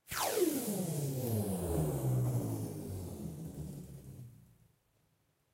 Electrical Tape Pull - Medium
A close perspective recording of me pulling open a roll of electrical tape.
This amazing sound is only possible to witness from the perspective of a microphone.
Recorded with a Shure MV88.
tape, aliens, space, wars, star, alien, laser